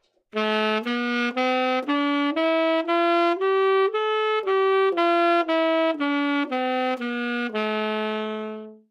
Sax Tenor - A minor
Part of the Good-sounds dataset of monophonic instrumental sounds.
instrument::sax_tenor
note::A
good-sounds-id::6153
mode::natural minor
Aminor, good-sounds, neumann-U87, sax, scale, tenor